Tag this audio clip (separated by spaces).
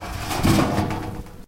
clang metal metallic sheet